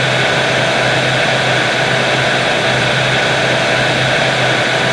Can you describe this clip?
Created using spectral freezing max patch. Some may have pops and clicks or audible looping but shouldn't be hard to fix.
Perpetual, Soundscape, Everlasting, Background, Sound-Effect, Still, Atmospheric, Freeze